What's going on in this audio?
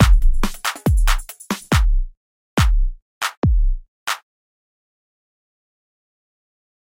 A sound for dance
downtempo, filter, chillout, dj, club, producer, hip, downbeat, hardcore, slow, hip-hop, phat, hiphop, drum, drum-loop